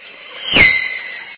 DS F1 Pro 1
resample of car passing by ...
fast, sample, short, sound